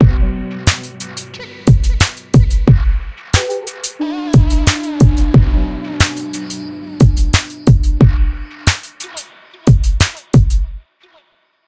A drum loop with a ambiet atmos layer good fo a chilled vibe